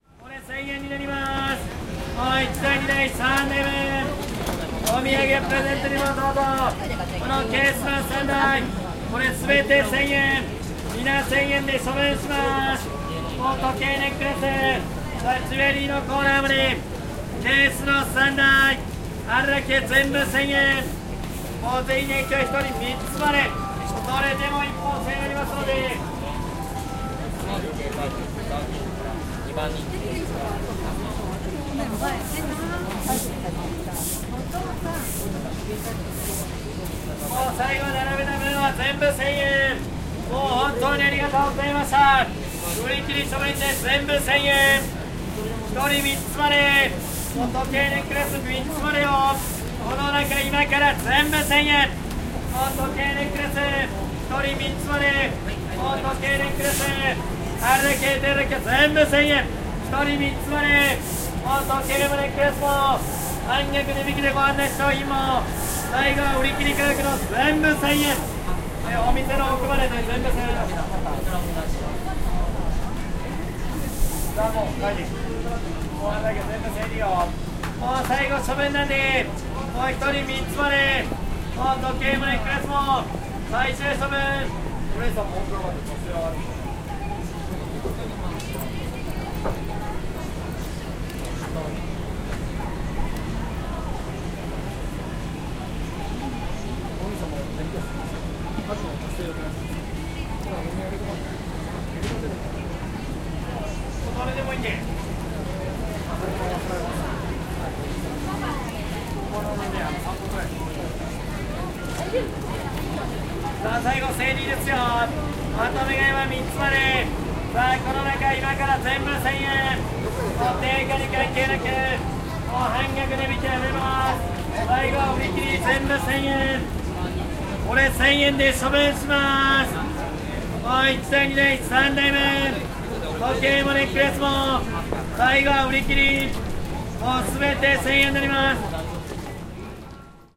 0393 Watch seller
Watch seller in japanese, sweeper, people walking and talking. Subway. Music in the background.
20120807
seller, japanese, sweeper